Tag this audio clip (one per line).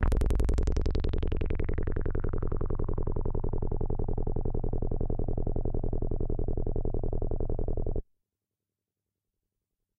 deckardsdream
synthetizer
single-note
midi-note-2
analogue
multisample
midi-velocity-90
D-1
cs80
synth
ddrm